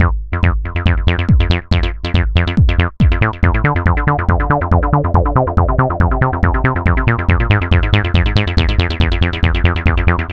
new stuff1
techno, fl-studio, loop